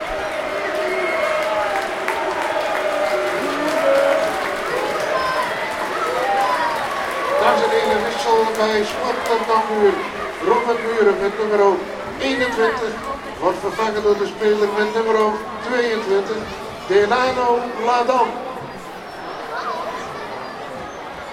Soccer Stadium 09
Field-recording of a Dutch soccermatch.
Recorded in the Cambuur Stadium in Leeuwarden Netherlands.
match, stadium